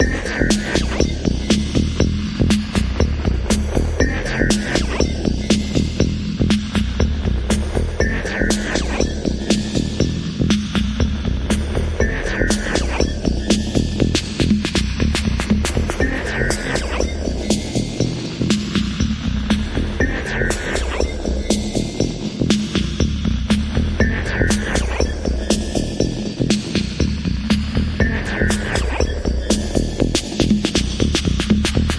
MA CrazyRhytms 7
Sound from pack: "Mobile Arcade"
100% FREE!
200 HQ SFX, and loops.
Best used for match3, platformer, runners.
abstract, electric, electronic, freaky, future, game-sfx, glitch, lo-fi, loop, machine, sfx, sound-design, soundeffect